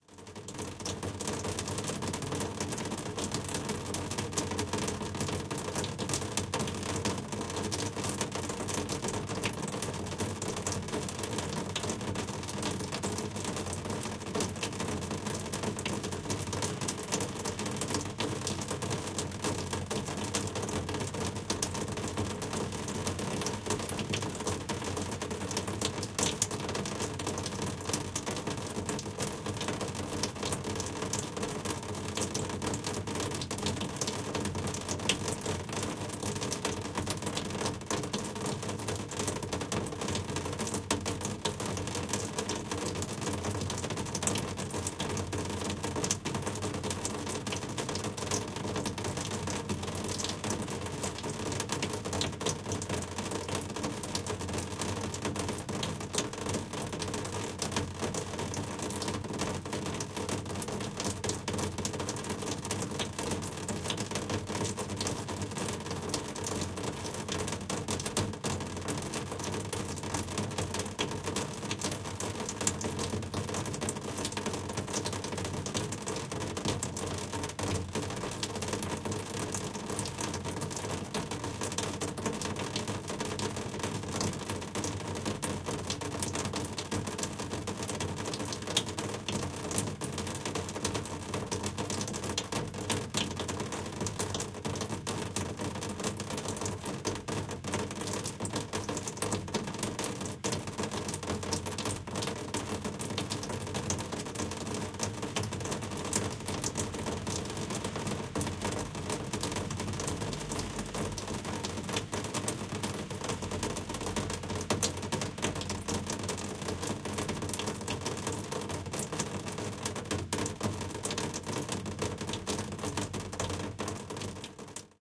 Rain on Sheet Metal 1
1 of 5. Close mic'd raindrops on a window air conditioner. Narrow stereo image. Some distant street noise. Try layering all five or panning them to surround channels.
Rain, sheet-metal, car